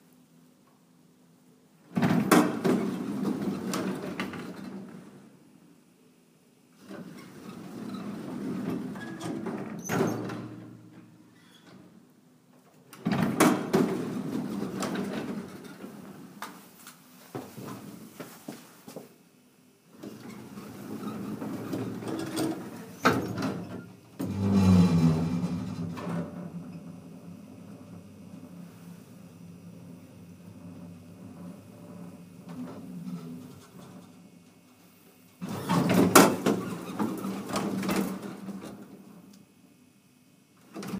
Sound of old elevator
close, door, doors, elevator, lift, metal, old, open